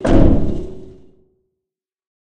Estlack doorslam rvrb 3shrt
car door slam with reverb, pitch modification, eq